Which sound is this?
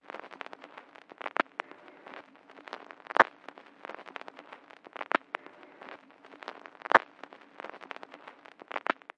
Vinyl Dust4
record, surface-noise, crackle, vinyl